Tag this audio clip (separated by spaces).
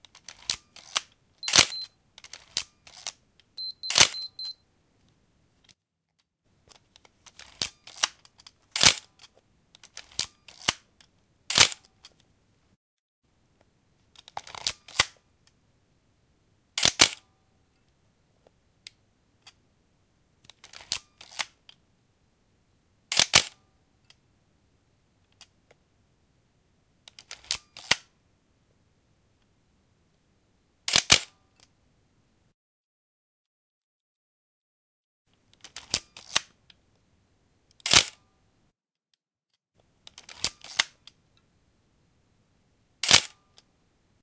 camera film x700 minolta